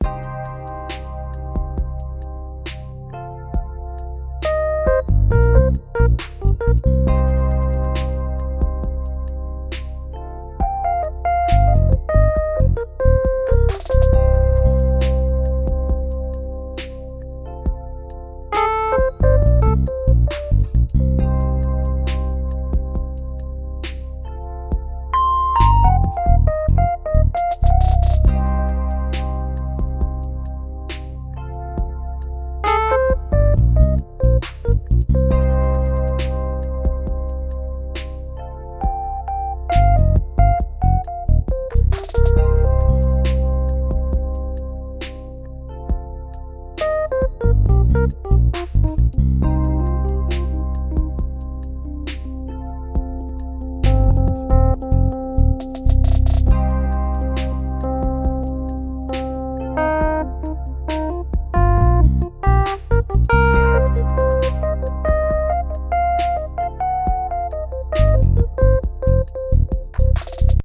Smooth 68.2 CM
These loops are a set of slow funk-inspired jazz loops with notes of blues overlaying a foundation of trap drums. Slow, atmospheric and reflective, these atmospheric loops work perfectly for backgrounds or transitions for your next project.